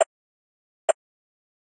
droog perc loop 1
a minimal percussion loop
loop, minimal, percussion